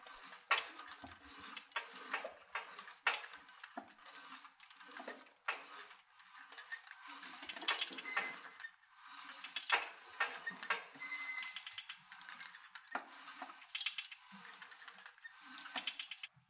weights rope 01
machine, rope, weights, gym